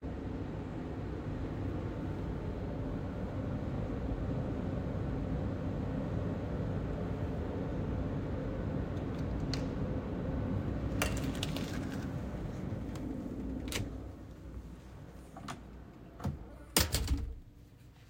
heavy door open close outside to inside
A heavy-duty studio door opening and closing from outside in the loud hallway to inside the studio.
open, loud, heavy, close, closing, opening, quiet, hallway, thud, door, iron, studio